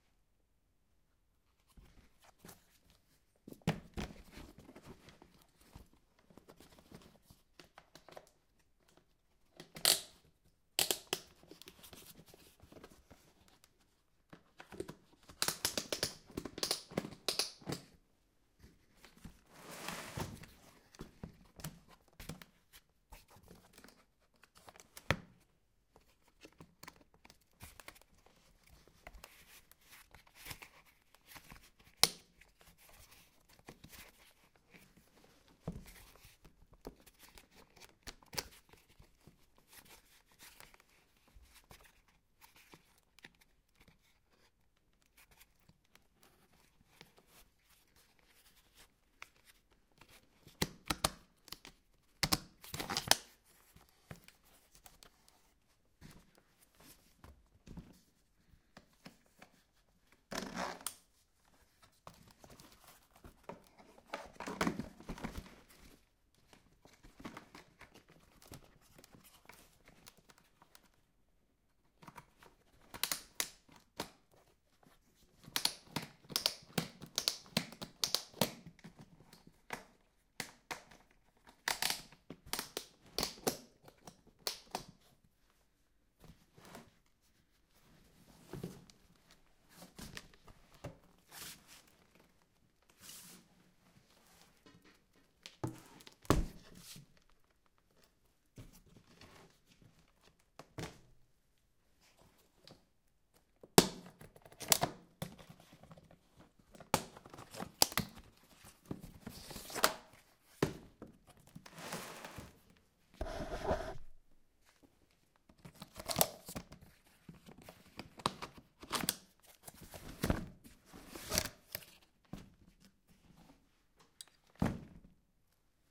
Handling Snowboard Strap-In Boots Foley
Putting snowboard boots on a snowboard with strap-in bindings and off again. Recorded with a Zoom H2.
click, clicking, equipment, equipping, foley, game, gear, inventory, snowboard